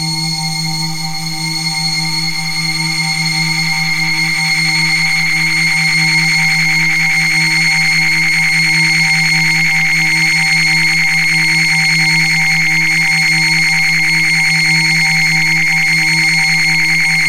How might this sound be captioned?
scaryscape scarydoublethrill
a collection of sinister, granular synthesized sounds, designed to be used in a cinematic way.
movie, electro, cinematic, drone, drama, atmosphere, danger, monster, illbient, granular, fear, experiment, alien, creepy, bakground, dark, horror, effect, ambient, filter, lab, ambience, film, pad, mutant, criminal, noise, bad, abstract, approach